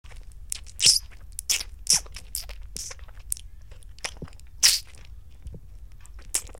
slime noise 8 1
Slime noises done by J. Tapia E. Cortes
putty, GARCIA, goo, slime, live-recording, Mus-152, SAC